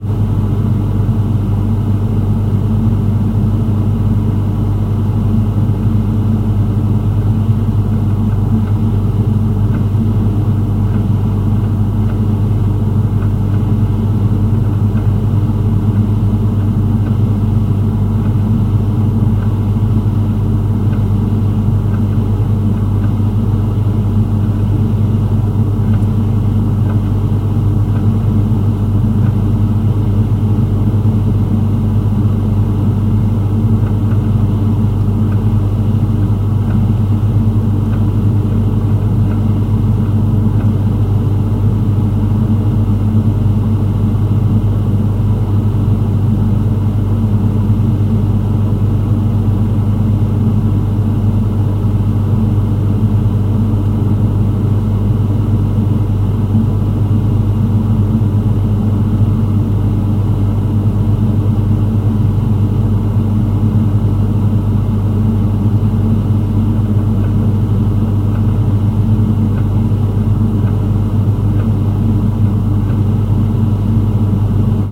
recording inside the freezer
appliance, cooler, fridge, kitchen, refrigerator